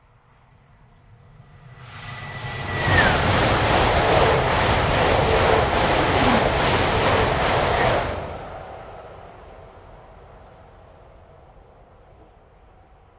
Fast train passing L-R
A German ICE train passing at high speed from left to right. Recorded with a Zoom H2n.